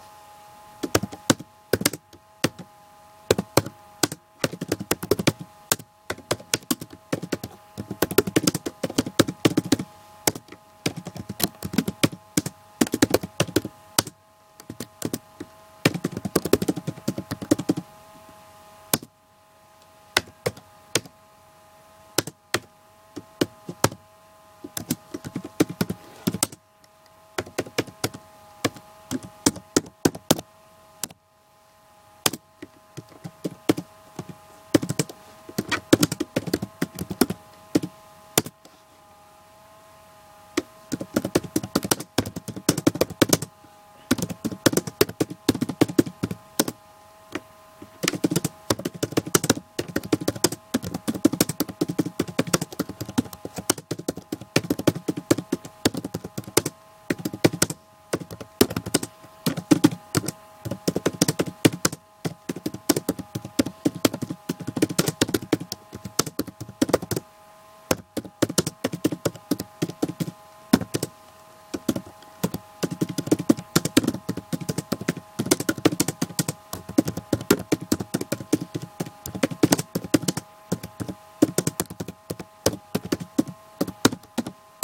laptop keyboard
Typing on my laptop computer's keyboard. You can also hear the sound of the fan inside the computer.
computer, keyboard, keys, laptop, type, typing